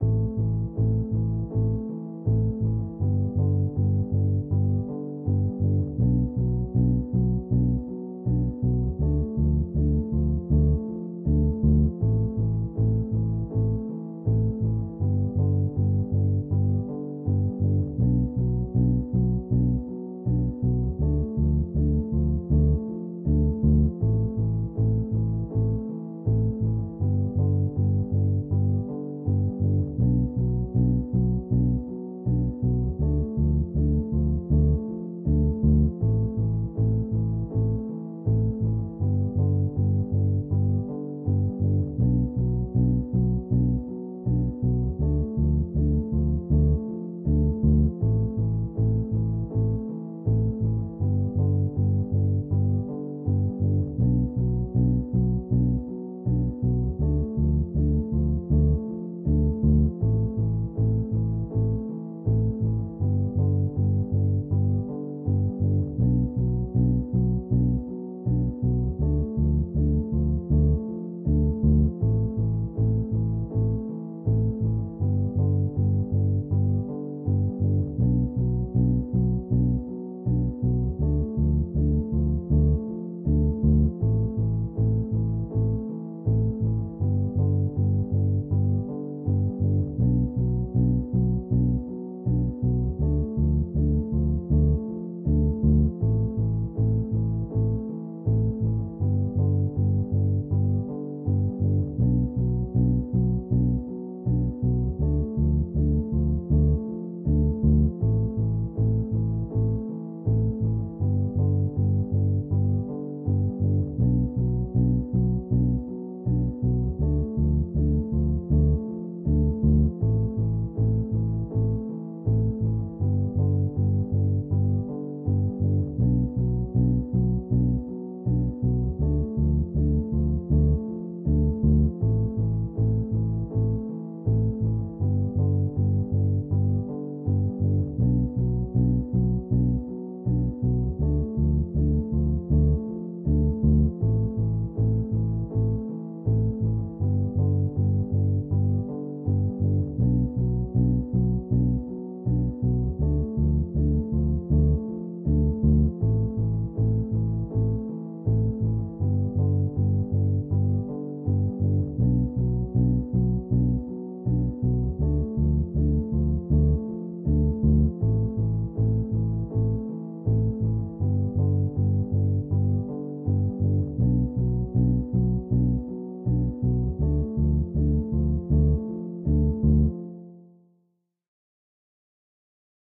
Dark loops 055 simple mix version 2 80 bpm
loop, piano, bpm, dark, bass, 80bpm